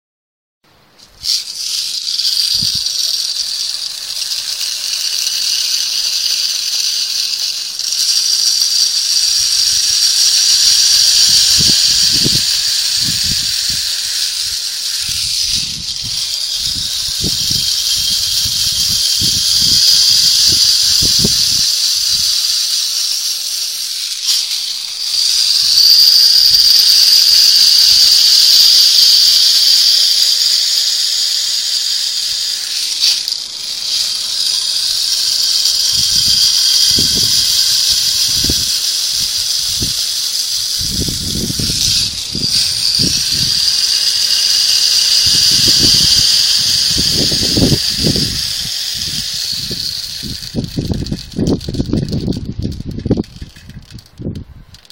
plastic rainstick 005
Sound of small metallic balls passing through a plastic rainstick.
fx, plastic, rainstick, rain, stico, pal-de-pluja, sound-effect, percussion, palo-de-agua, palo-de-lluvia, pl, percussi, stic, shaker